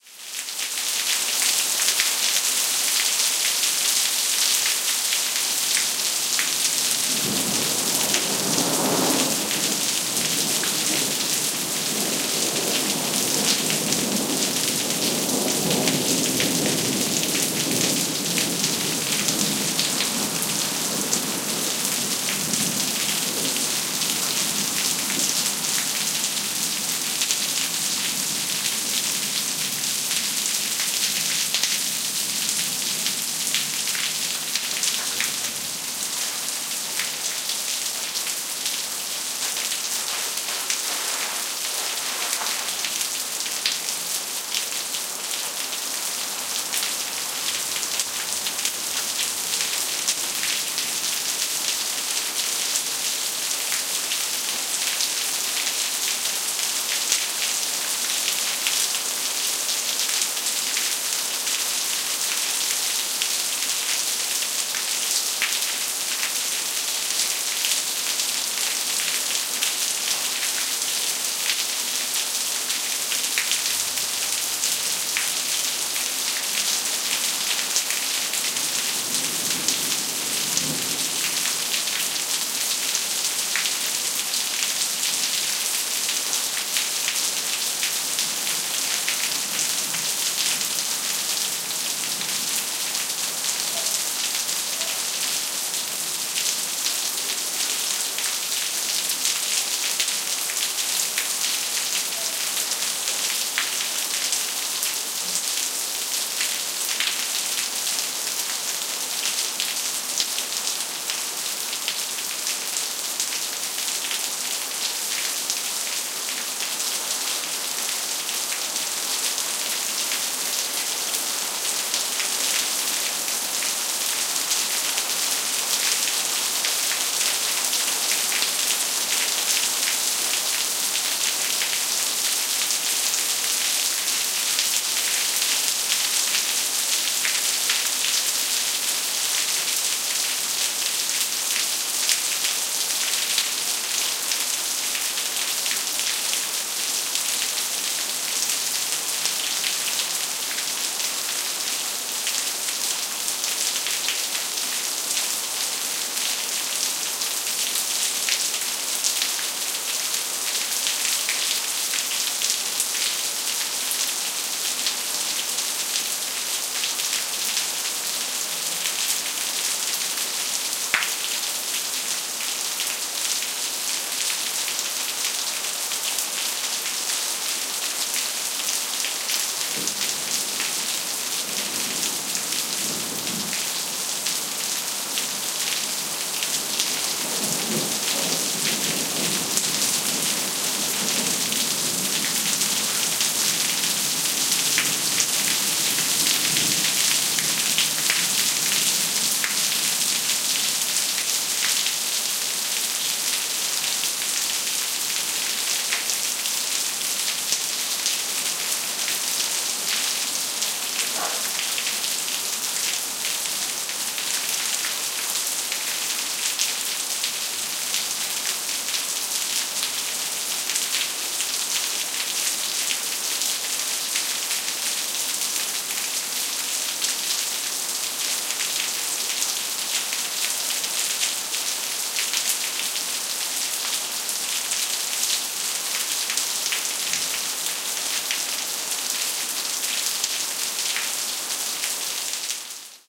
20151101 hard.rain.3thunders
Raindrops falling on pavement + thunder. Primo EM172 capsules inside widscreens, FEL Microphone Amplifier BMA2, PCM-M10 recorder. Recorded at Sanlucar de Barrameda (Andalucia, S Spain)
field-recording, nature, rain, south-spain, storm, thunder, thunderstorm